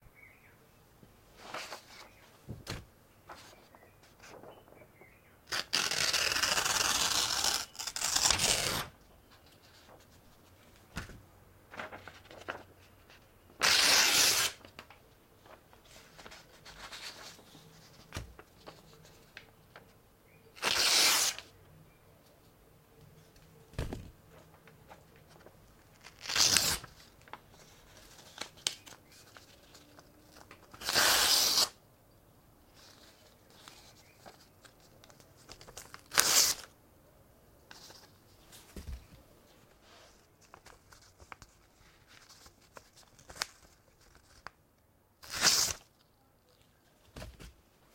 Tearing book pages: The sound of someone hastily tearing paper as well as sometimes tearing it more slowly, ripping sounds, abrupt and noisy sounds. This sound consists of several one shot variations in one take. This sound was recorded with a ZOOM H6 recorder and a RODE NTG-2 Shotgun mic. Post-processing was added in the form of a compressor in order to attenuate some of the sound's transients that caused clipping, while still keeping the rest of the sound's levels audible and vibrant. This sound was recorded by someone both hastily and slowly tearing sheets of paper into several smaller divisions. The smaller divisions would sometimes be placed over each other and then ripped in order to make the sound carry more impact, all while being recorded with a shotgun mic.